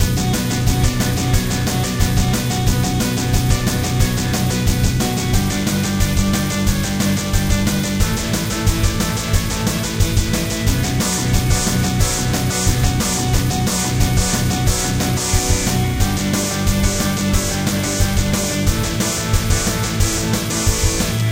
Fast Metal/Rock thing. Loop was created by me with nothing but sequenced instruments within Logic Pro X.
dark, epic, fast, intense, loop, loops, metal, music, rock, song